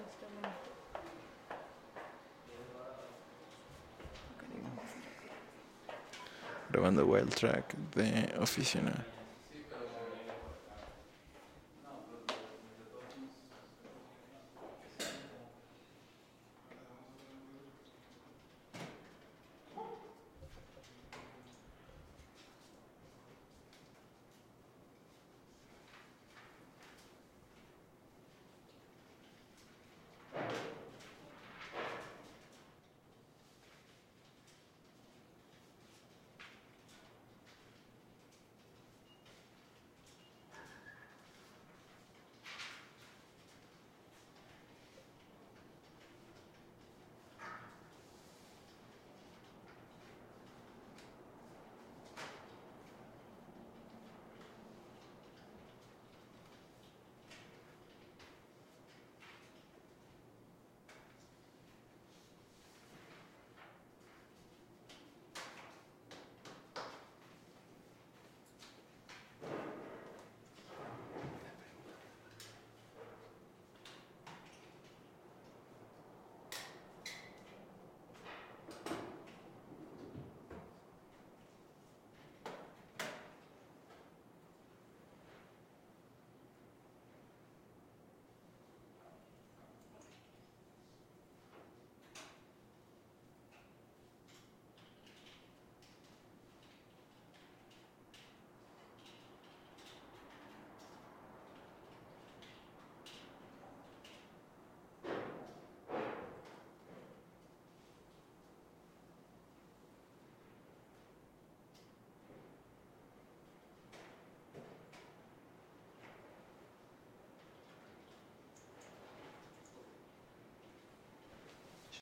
Office Sound
background, room, office, ambience